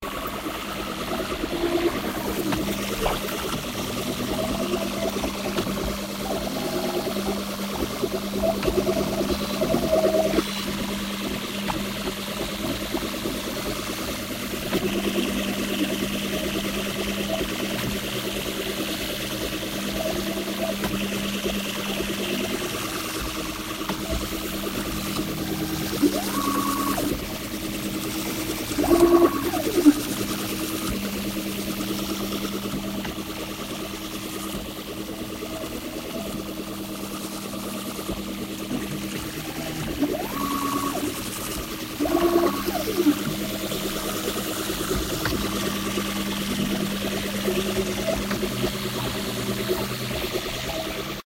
Post Apocalyptic2
this is another post Apocalyptic and can be used to ascentuate a factory scene
machinery, mechanical, factory, machine, loop